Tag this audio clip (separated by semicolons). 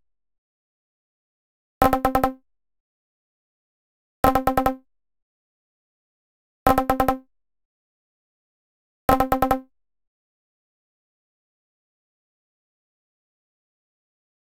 120BPM; ConstructionKit; dance; electro; electronic; loop; rhythmic; synth